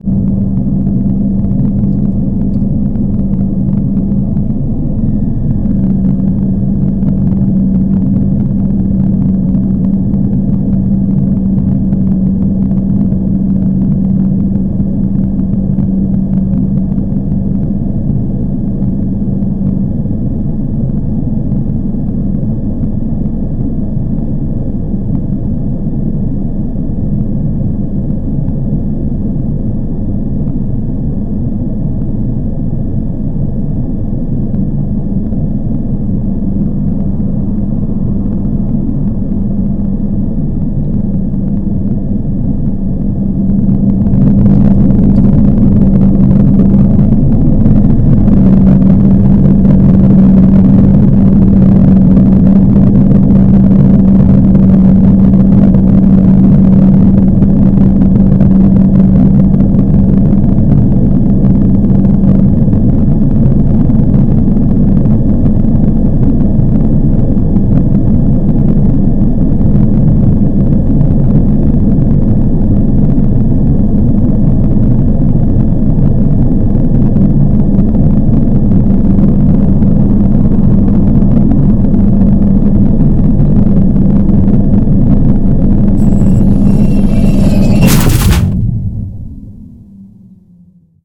a generator failing